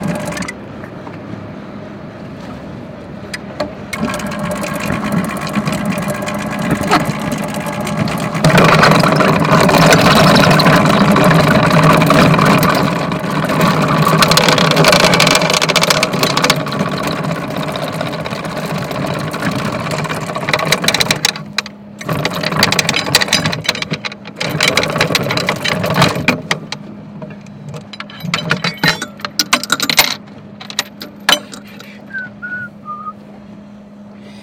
An anchor chain on a boat being raised up out of deep water by an electrical motor winch.
anchor, anchor-chain, boat, boat-anchor, field-recording, water, winch